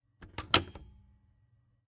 Tape machine button press metal
A tape machine which I recorded various buttons and switches on. This was a nice one with a metallic ring to it.
button, heavy, machine, metal, metallic, press, real, ringing, short, switch, tape